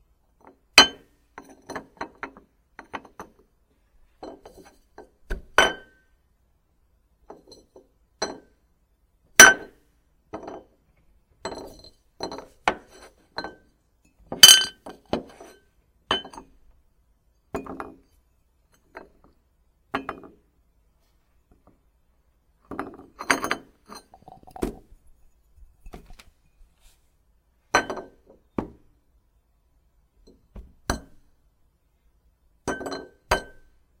Ceramic and Glassware Set Down
A ceramic plate, and glass cup set down, on a table
set-down, dinner, eating, dish, cup, dishes, plate, porcelain, kitchen, clank, plates, cups, ceramic